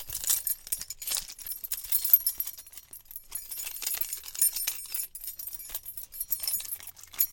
Jangling Car Keys